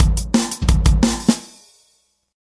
eardigi drums 26
This drum loop is part of a mini pack of acoustic dnb drums
dnb, percs, breakbeat, beat, neurofunk, rhythm, break, drum, drum-loop, jungle, loop, percussion-loop, snare, bass, groovy, drums